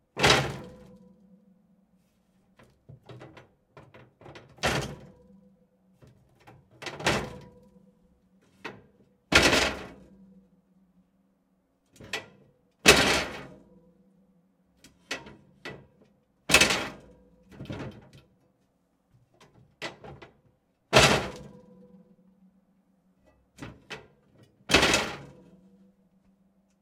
Banging metal and it makes an echo